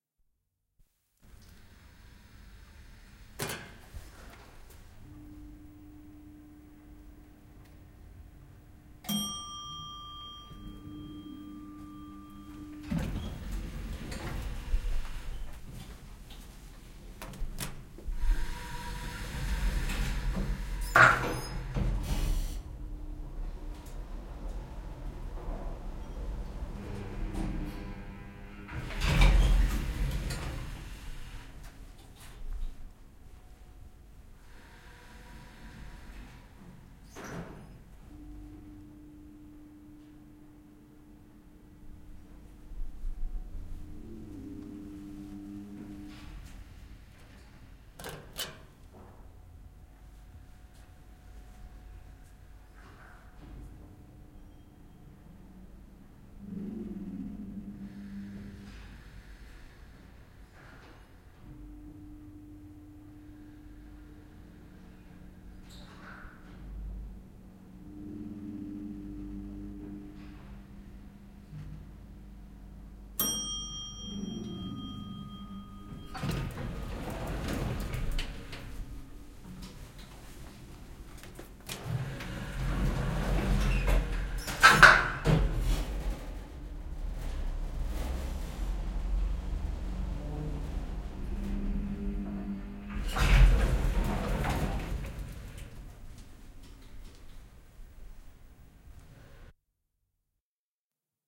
Hissi - Elevator
Painetaan ulkopuolelta hissin nappulaa, hissin tulo, kello, äänisignaali, automaattiovi auki, meno sisään, painetaan kerrosnappulaa, ovi kiinni, kulkua hississä, pysähdys, ovi auki, ulos hissistä, ovi kiinni. Tilataan hissi, hissin ovenkäyntejä kuuluu muista kerroksista. Hissi tulee, kello, automaattiovi auki, kerrosnappula, kulkua, pysähdys, ovi.
Automatic door of an elevator, a lift, sliding open and close, push of a button, riding the elavator
Paikka/Place: Suomi / Finland / Helsinki / Pasila
Aika/Date: 10.11.1983
auki, bell, close, closing, door, elevator, field-recording, finnish-broadcasting-company, hissi, kello, kiinni, lift, nappi, open, opening, ovi, riding, tilaus, yle, yleisradio